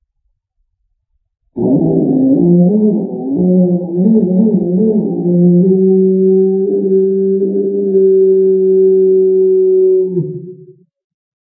Close up recording of my rooster (less than 1 meter) but slowed down to sound like a dinosaur (niece needed the sound for a school project)